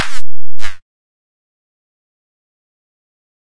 flstudio random actions